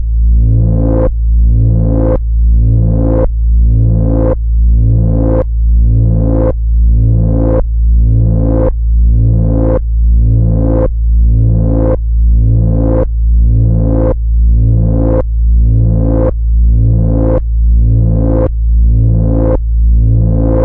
Massive analog wobble sound fx.